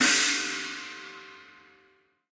03 Crash Med Cymbals & Snares
bronze, crash, custom, cymbal, cymbals, hi-hat, hit, metronome, one, one-shot, ride, turks